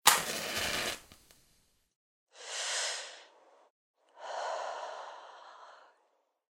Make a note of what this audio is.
Man lights a cigarette with a match
OFFICE SOUND FX - home recording